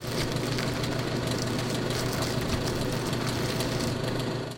Truck Driving
I faked the sound of a driving truck with a vending machine, a plastic tube, and a box full of concrete dust.
Car, Driving, Dirt, Rocks, Truck, Road